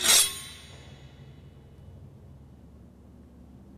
Sword Slice 16
Sixteenth recording of sword in large enclosed space slicing through body or against another metal weapon.
slash, foley, sword, slice